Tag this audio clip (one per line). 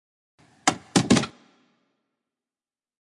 crack
clunk
wood
tune
Thunk
knock